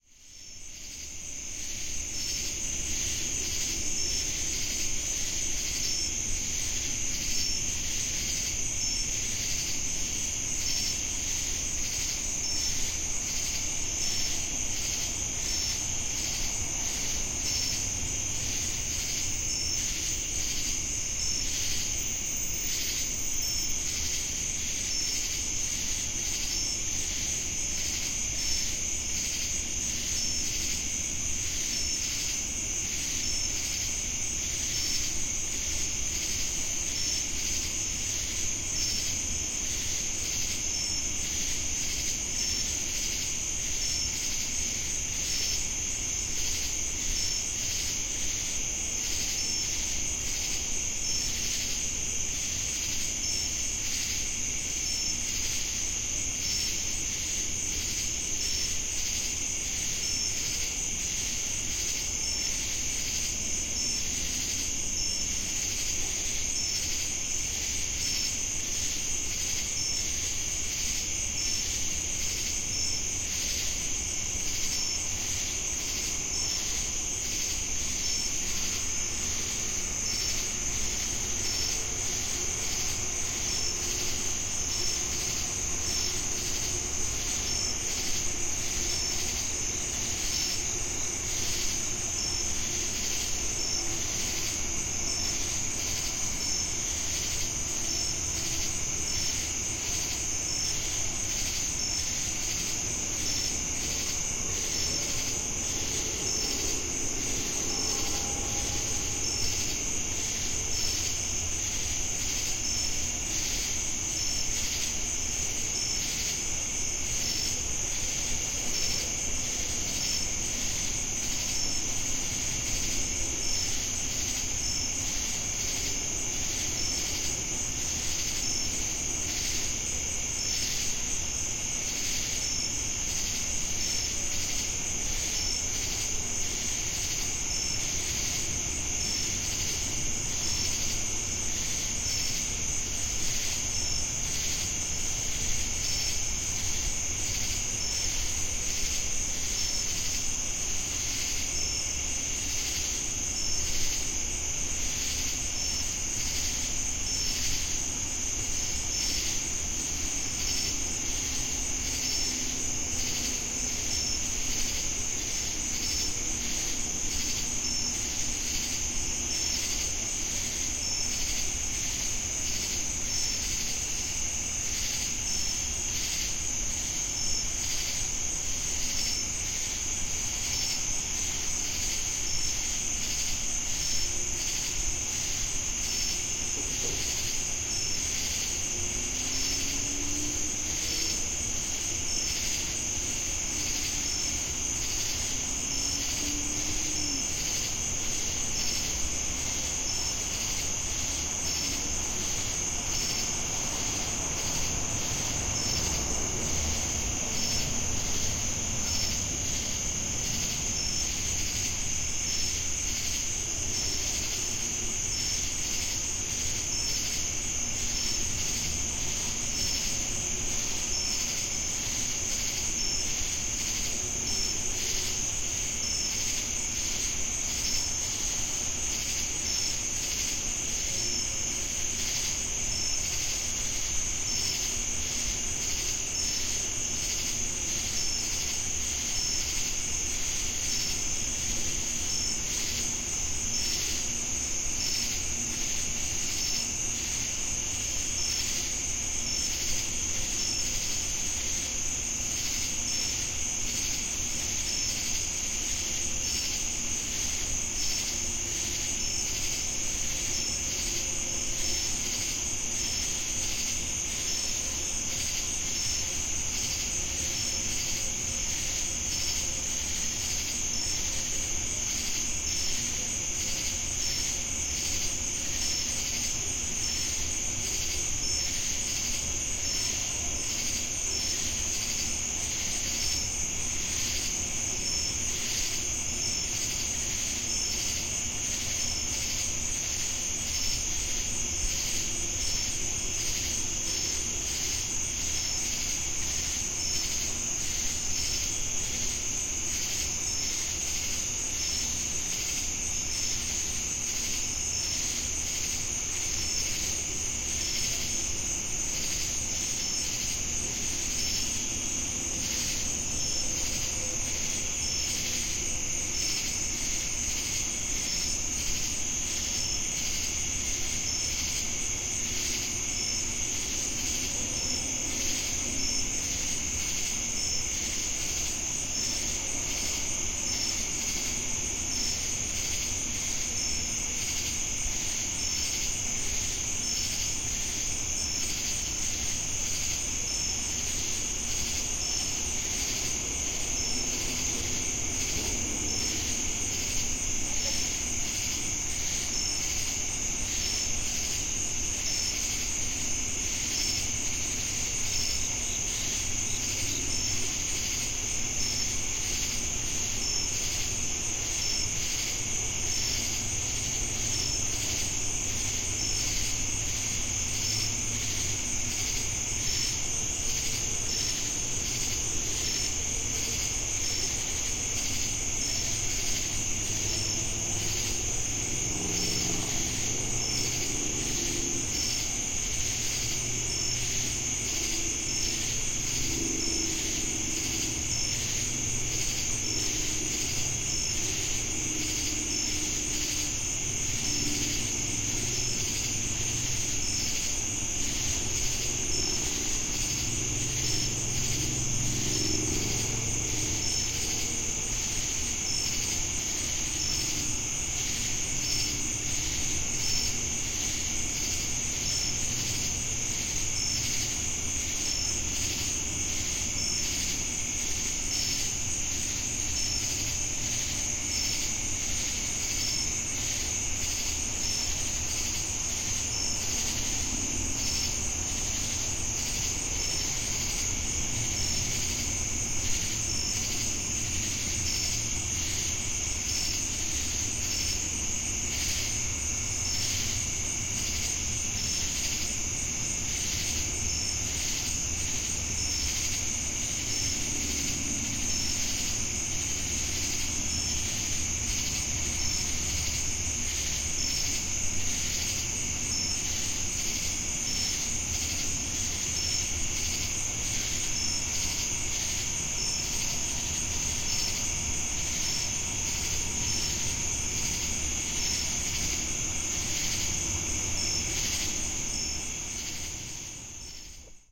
A few minutes of ambiance, mostly crickets and cicadas, at around 10:00 PM on August 10, 2018, recorded in Jamestown, NC with an iPhone 8 and Shure MV88.